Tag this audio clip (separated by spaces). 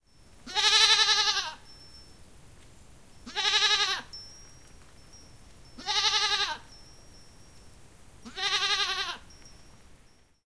goat short sound